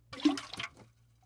Slosh of Liquid in Pitcher x1 FF352
Liquid sloshes in container, short, swirling liquid hitting inside of container
bottle, sloshes